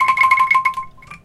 c5-bandung-angklung vib
toy angklung (wooden shaken percussion) from the Selasar Sunaryo artspace in Bandung, Indonesia. tuned to western 12-tone scale. recorded using a Zoom H4 with its internal mic.